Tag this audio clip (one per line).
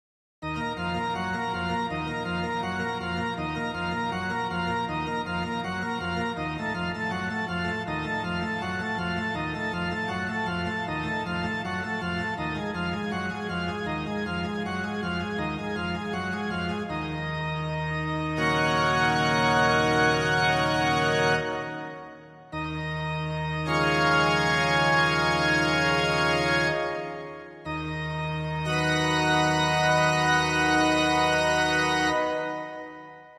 synthesizer
organ